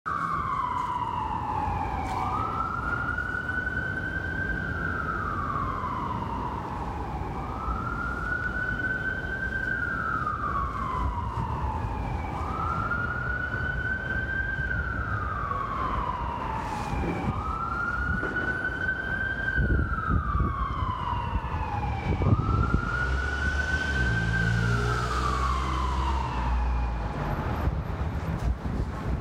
siren in nyc
Caught this ambulance driving a few blocks away from me as I was walking to the subway from Washington Square Park. Recorded using my phone.